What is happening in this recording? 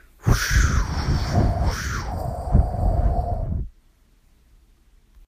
wind breeze swoosh air gust